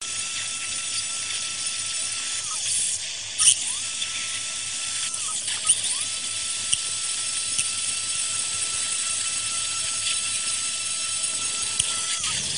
OP Bohrer 21
Geräusche aus einem Operationssaal: Drill noise with clinical operating room background, directly recorded during surgery
Klinischer, Ger, noise, surgery, Theater, OR, Operationssaal